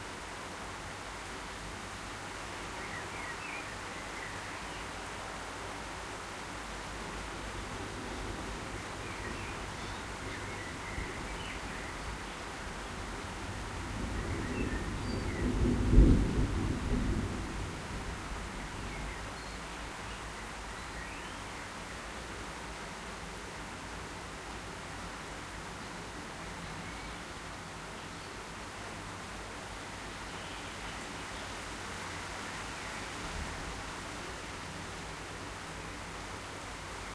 Distant thunder recorded with a Rode Stereo Videomic